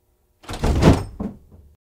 Door Open 1
Wooden Door Open Opening
door, opening, open, wooden